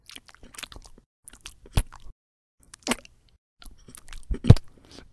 Wet, sloppy eating noises, originally for gross insect noises.